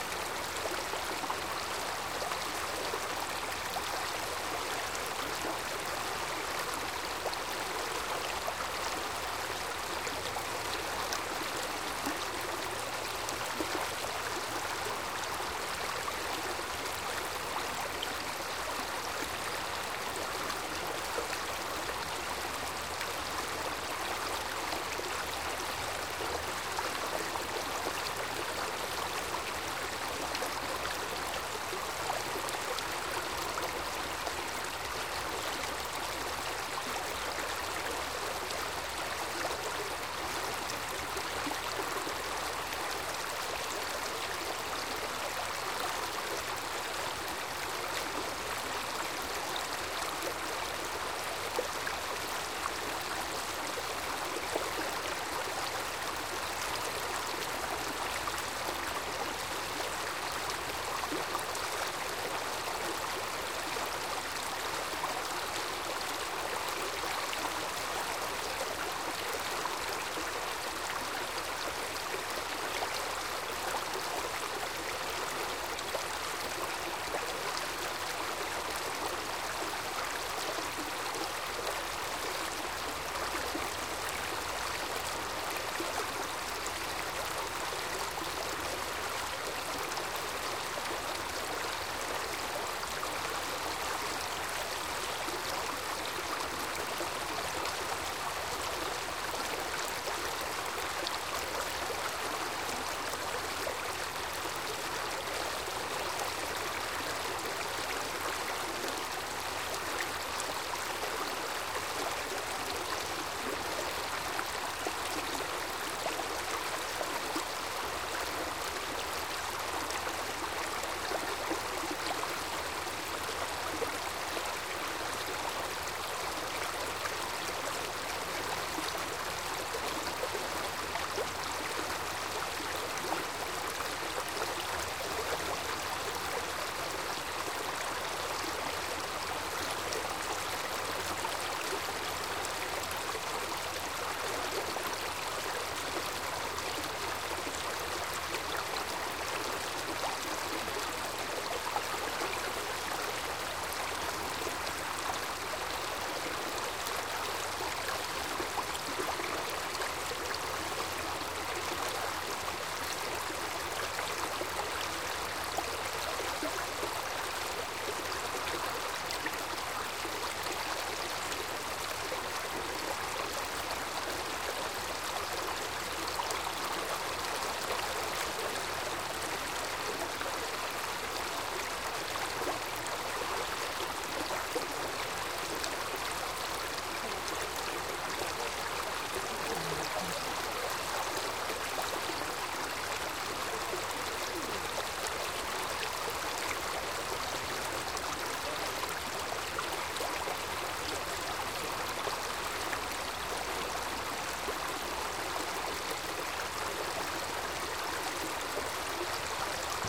creek babbling
a small cascade in a creek produces this lovely sound